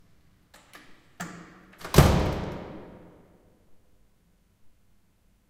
Door Smash 2
Door slam. A very heavy door closing on its own at HTW Berlin.
Recorded with a Zoom H2. Edited with Audacity.
architecture, bang, banging, berlin, campus, close, closing, denied, door, doors, echo, entrance, field-recording, gate, heavy, htw, htw-berlin, metal, school, shut, shutting, slam, slamming, university